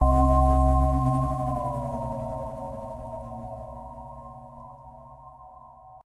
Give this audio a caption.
a soft, haunting chord
creepy, digital, drama, dramatic, electronic, haunted, horror, phantom, scary, sci-fi, sinister, spooky, sting, suspense, synth, terror, thrill